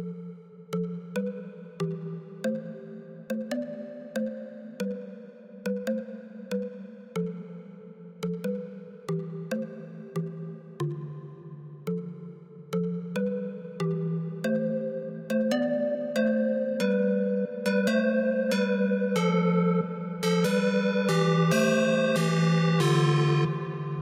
Perhaps, the song could be used as something arcade stuff.(Fl studio 12, plugin Harmor)
Arcade, Game